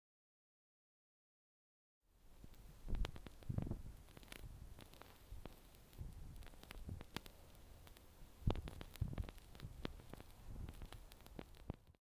burning candle in the wind
Sound of burning candle in windy weather.
burning, fire, Czech, candle, CZ, wind, Panska